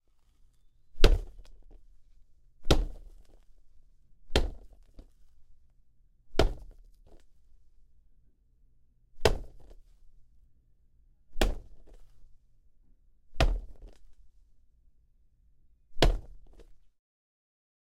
46-Hit Soccer Ball Mannequin
Hit Soccer Ball Mannequin